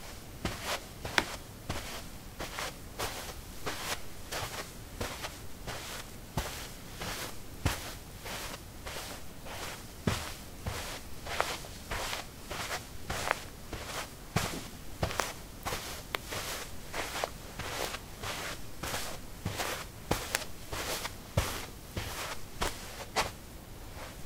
carpet 16a trekkingshoes walk
Walking on carpet: trekking shoes. Recorded with a ZOOM H2 in a basement of a house, normalized with Audacity.
footsteps, steps